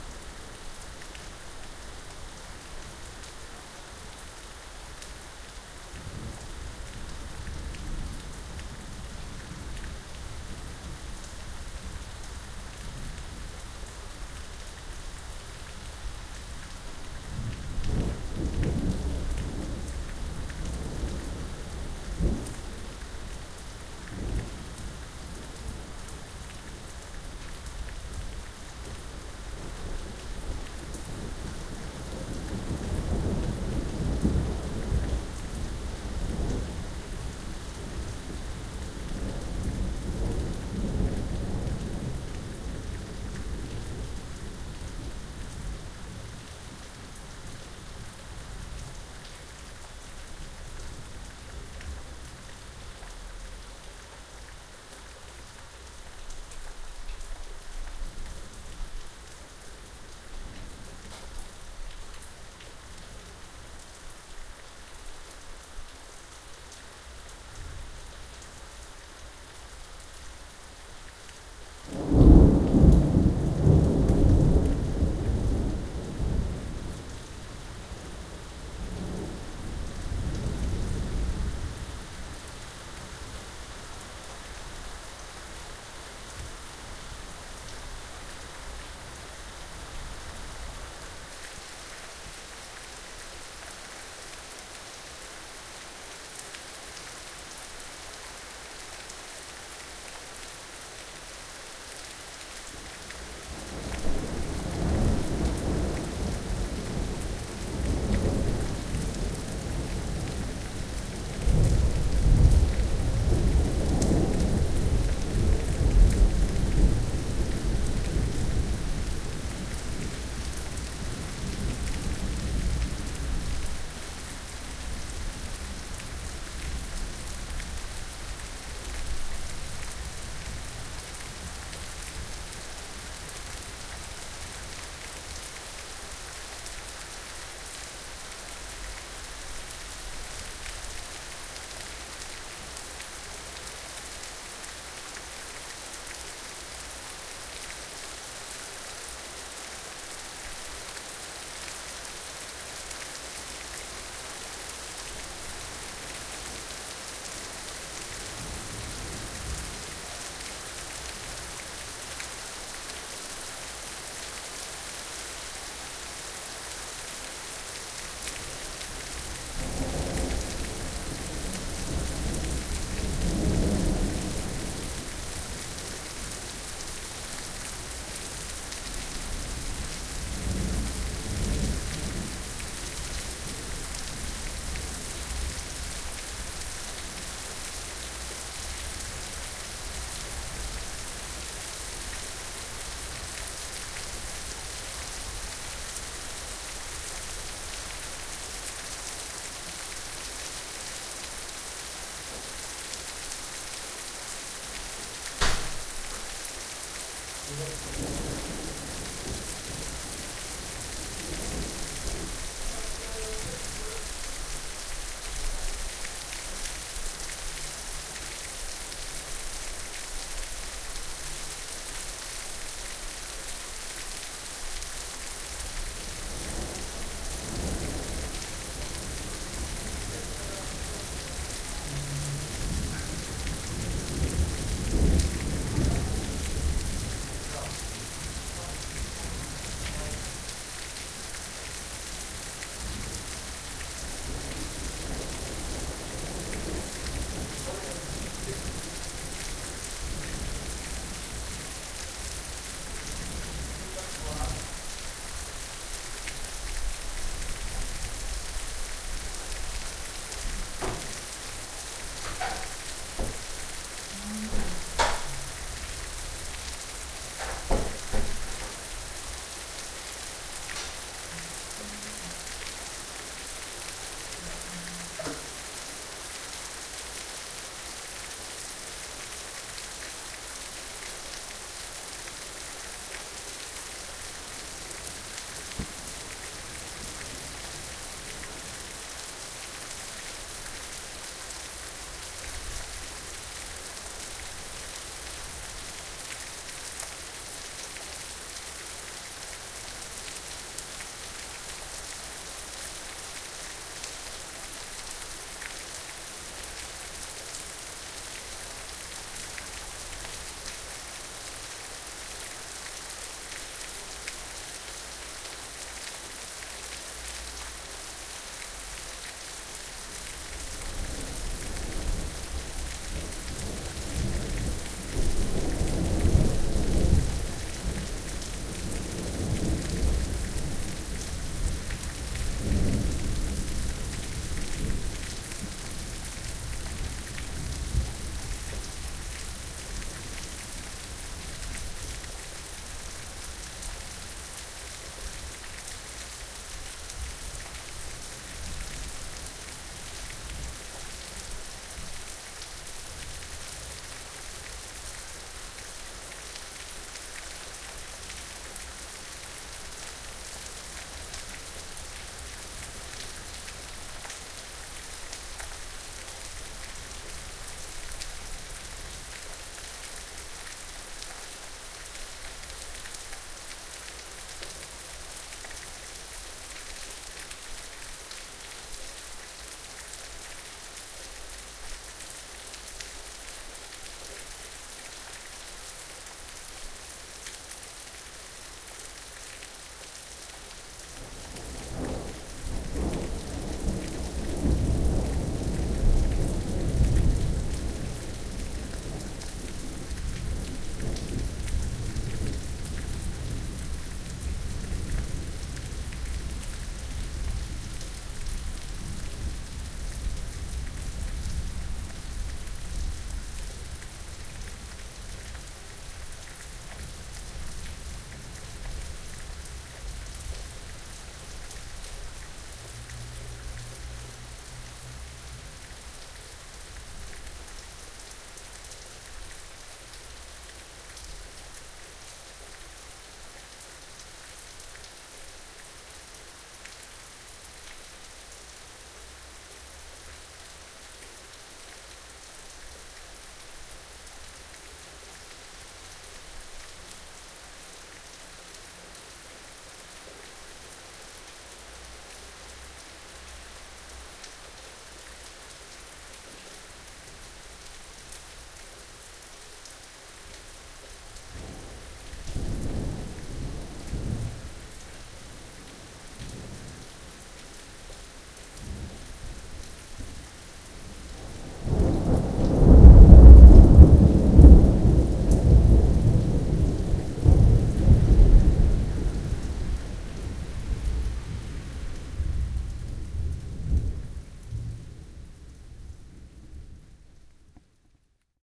Rain and thunder (great recording)
Rain and distant thunder sound, recorded from the balcony.
Recorded with Zoom H1.
No editing, only normalized in Rx Advance 9.
Enjoy!